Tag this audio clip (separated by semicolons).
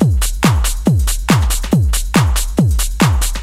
funky; beat; techno; loop; drum; breakbeat; 140bpm; house; dance; break